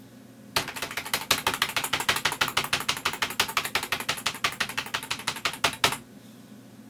Hammering the delete key on a keyboard